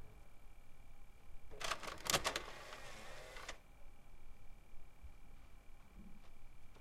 Recording a CD out of the PS3.
It was recorded with Zoom H4.
noisy, out, CD